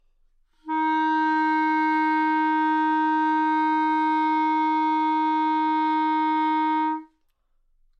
Part of the Good-sounds dataset of monophonic instrumental sounds.
instrument::clarinet
note::Dsharp
octave::4
midi note::51
good-sounds-id::597
clarinet, Dsharp4, good-sounds, multisample, neumann-U87, single-note